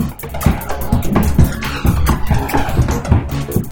Cheerful loop that I created from altering a mix of 3 other tracks, changing some individual beat elements as well. Loads of flanger, some very specific equalization, and few parts changed seperately.
beat, dance, drum, fast, flanger, happy, house, loop, trance